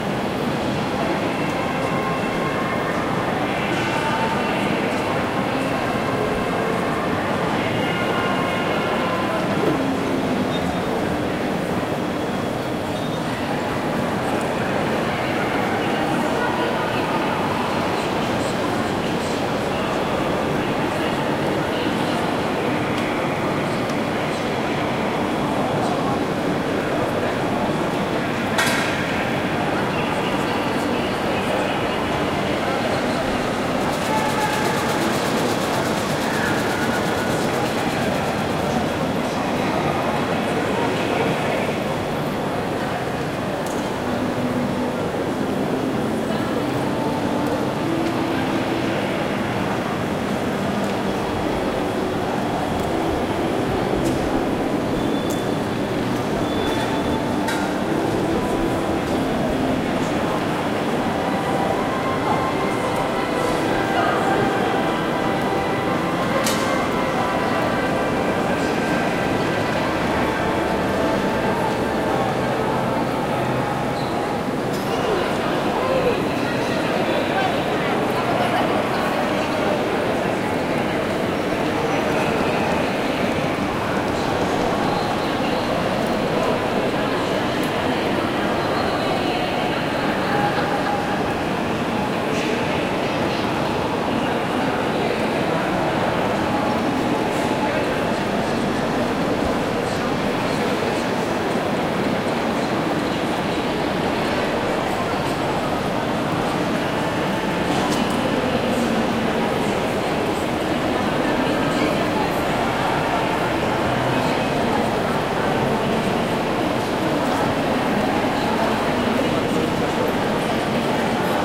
Standing on the upstairs level of Rome Termini train station, recording the platform below. Recorded with a Zoom H4 on 13 June 2008 in Rome, Italy.
field-recording, italy, rome, station, termini, train, walla